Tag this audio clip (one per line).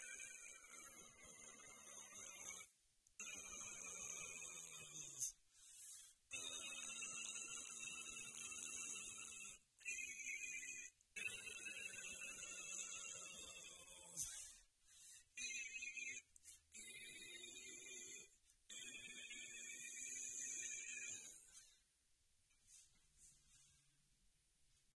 agony
torment